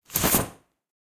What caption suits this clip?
Sound of short umbrella opening fastly, recorder with tascam dr07, unedited
paraguas, quick